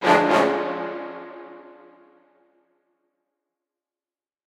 Orchestral Hit 1
A lot of effort and time goes into making these sounds.
An orchestral hit you might hear during a dramatic moment in a movie, show or video game. Or a radio play? Or a podcast? YOU DECIDE!
Produced with Garageband.